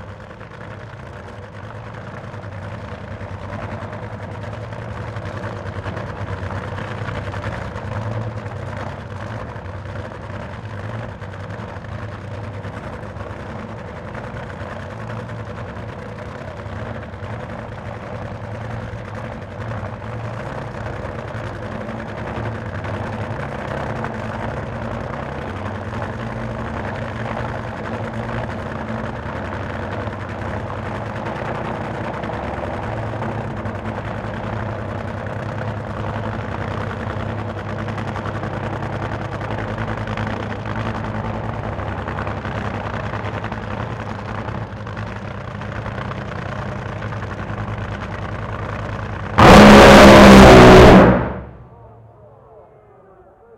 Top Fuel 4 - Santa Pod (C)
Recorded using a Sony PCM-D50 at Santa Pod raceway in the UK.
Motor-Racing, Dragster, Race, Drag-Racing